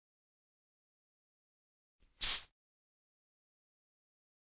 Helmet from spacesuit – taking off
Czech, Panska